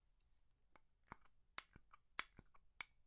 The sound of a knife slicing on a table
board, cutting, knife